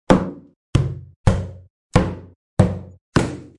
Punching Impact Sound
i am punching plastic box
impact, hit, plastic, punching, thud, Punch